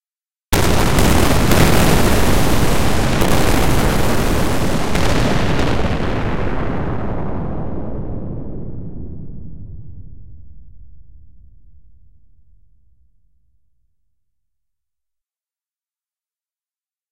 spaceship explosion3
atmosphere, battle, blast, energy, explosion, fighting, fire, firing, future, futuristic, fx, gun, impact, impulsion, laser, military, noise, rumble, sci-fi, shoot, shooter, shooting, soldier, sound-design, space, spaceship, torpedo, war, warfare, weapon